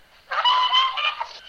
Me screaming. Yes, flowers do like to scream. A lot. God, I have no life :)